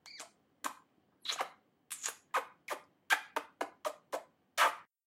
Not too serious kissing.